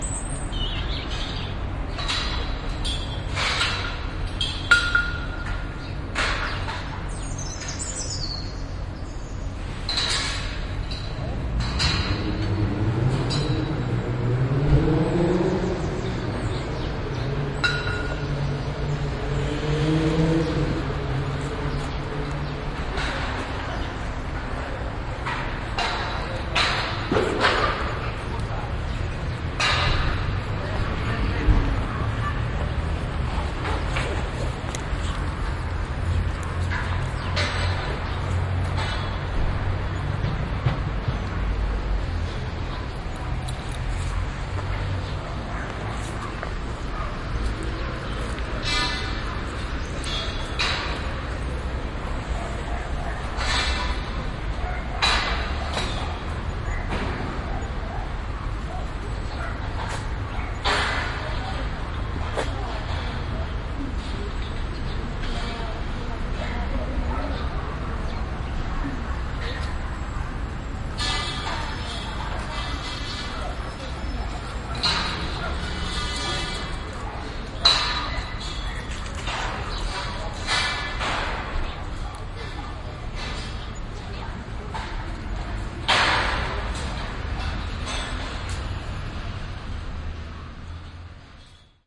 Parque urbano
Binaural, Field-recording, Paisaje-sonoro, Parque, Soundman-OKM